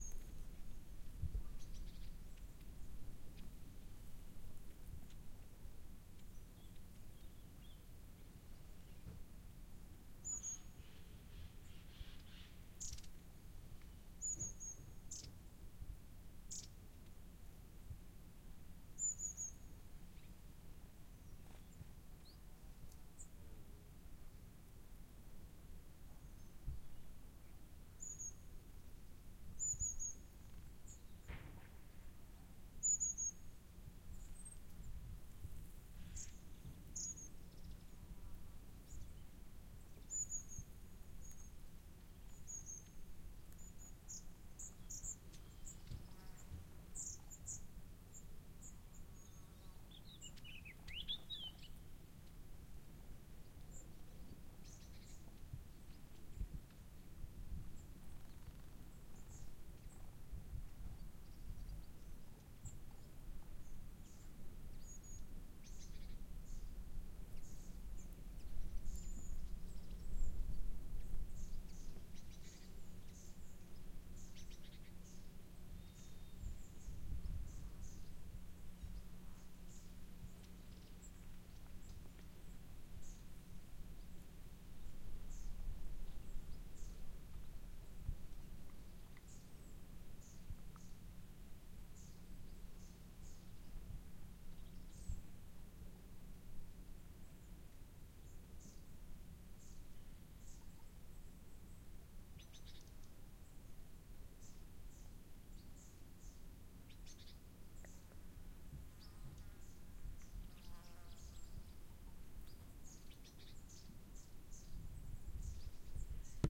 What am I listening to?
Ambiance forest in france 1
Field recording of a forest at the south of france